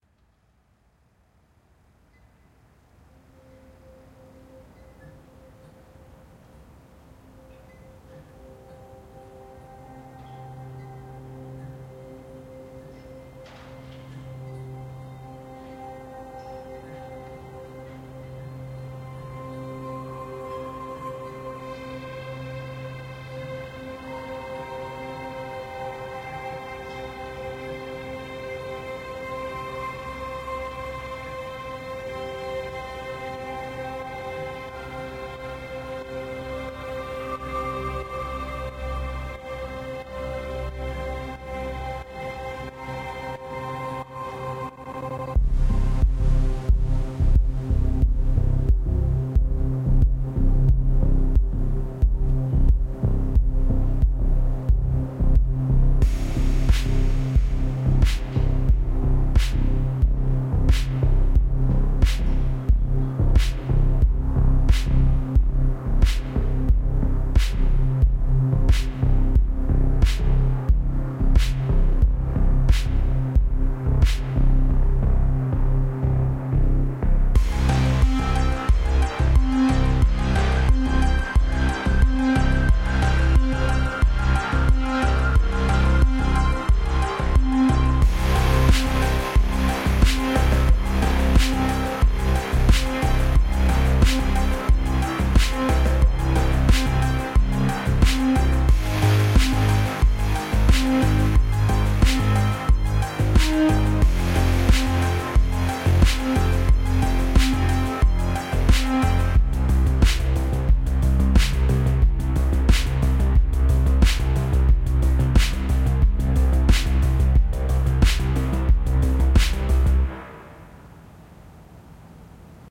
Cyberpunk retro crossover track part of a series of concept track series called "bad sector"